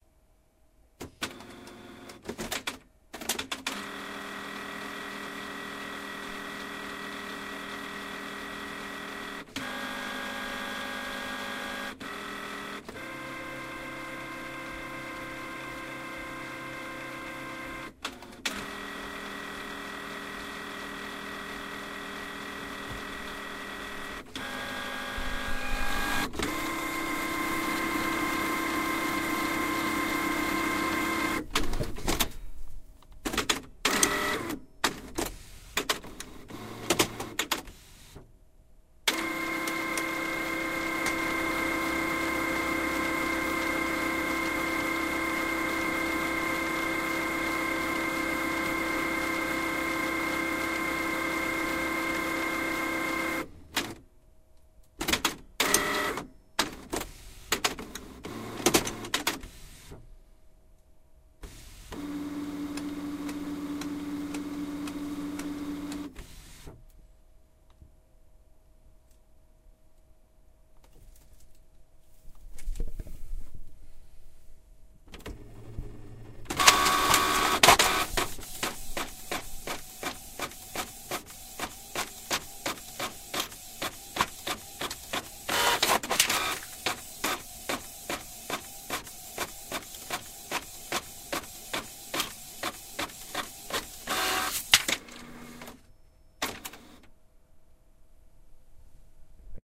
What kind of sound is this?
canon printer warming up
sci, lab, alien